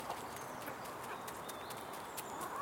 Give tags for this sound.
farm; buck; Chicken; animal

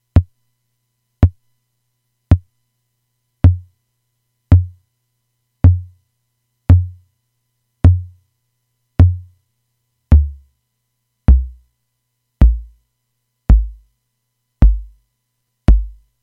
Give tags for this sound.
1983; kick; analog; drum; mpc

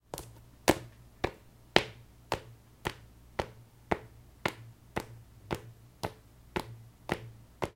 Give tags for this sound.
floor; steps; street

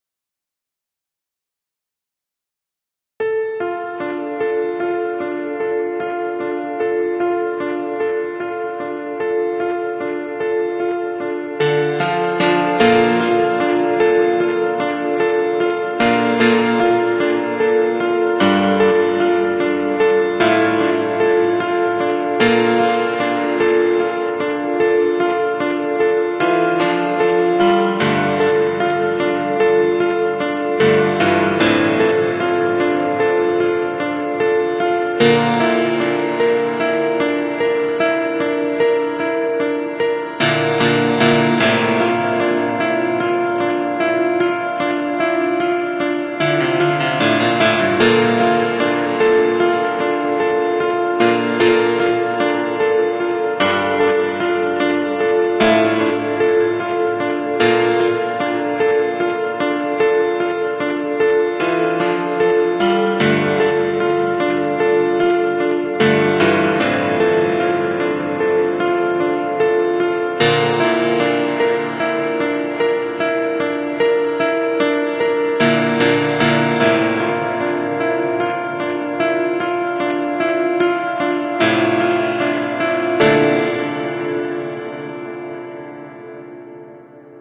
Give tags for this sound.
Sad; piano; piece; Depressive

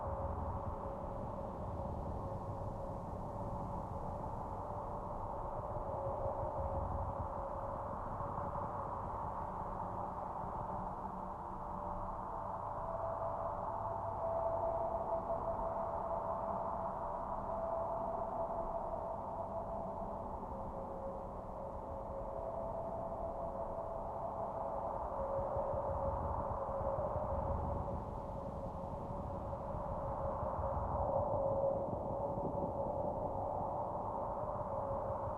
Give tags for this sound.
loop; sound; galaxy

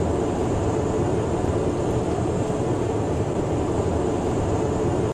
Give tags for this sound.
C510; jet-engine; sound; cabin; mustang; loop

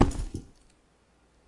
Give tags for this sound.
Handbag; Hardware; Leather; Alexander-Wang

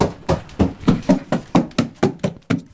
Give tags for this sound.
walking; run; feet; staircase; running; running-up; steps; foot; wooden; cracking; walk; old; wood; stairs; stair; stairway; footsteps

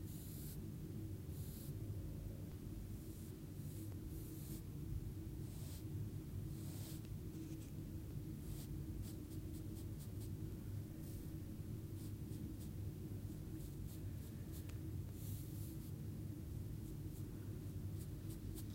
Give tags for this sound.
atrito-pincel; brush; objects; painting; pincel; pincel-superficie-lisa

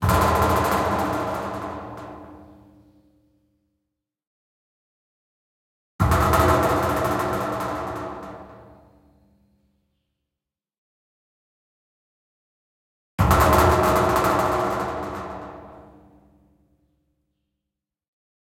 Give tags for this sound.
Impact; Metal; Container

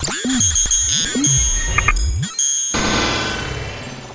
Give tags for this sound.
random; digital; glitch

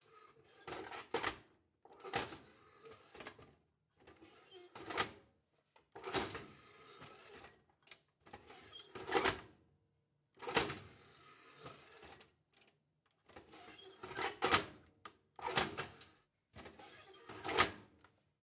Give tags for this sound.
house; household; cooking; door; fx; switch; kitchen; oven; sound-effect; stove; cook; sfx; metal

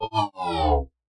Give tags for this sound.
scifi; Spaceship

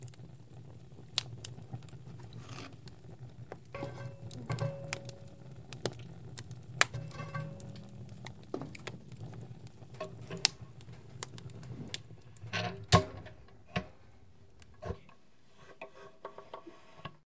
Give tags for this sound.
stove; fire; wood-stove; closing; metal; hard

